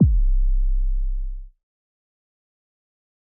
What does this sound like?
So with that being said I'm going to be periodically adding sounds to my "Dream Sample Pack" so you can all hear the sounds I've been creating under my new nickname "Dream", thank you all for the downloads, its awesome to see how terrible my sound quality was and how much I've improved from that, enjoy these awesome synth sounds I've engineered, cheers. -Dream